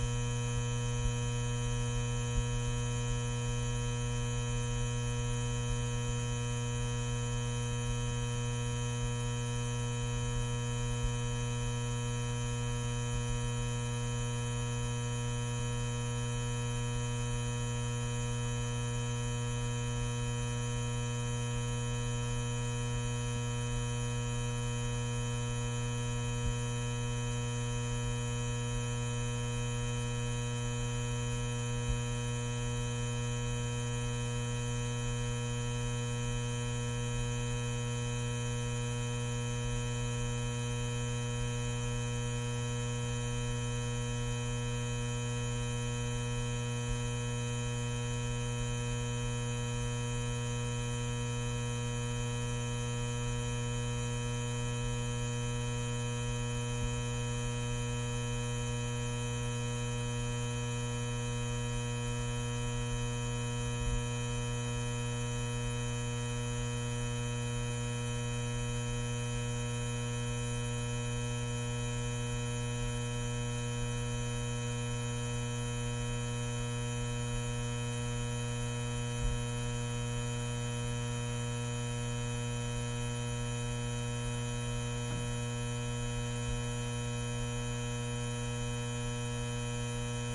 neon sign buzz hum stereo close lowcut to taste3
neon, buzz, taste, lowcut, hum, stereo, sign, close